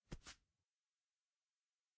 Foley recording of running my hand on a concrete surface. Was used for parkour sound effects in a project of mine, but has other applications as well.